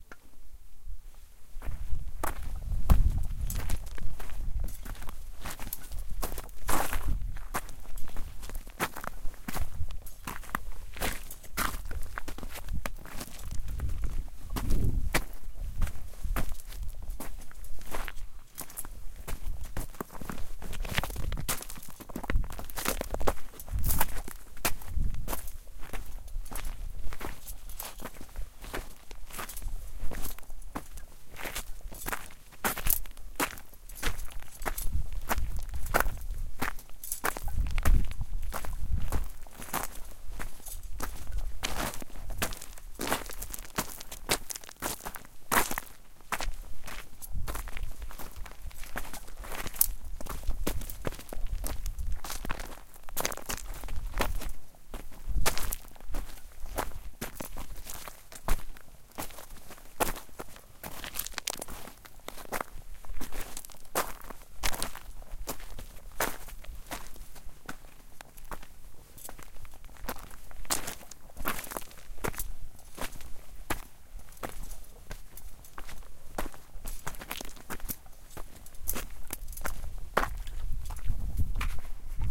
Walking on Rocks 02
This is a brief recording of hiking boots on small to medium loose rocks on a mountain fire road. May be good for a podcast as a background sound effect.
boots, Field-recording, hiking, Walking-on-rocks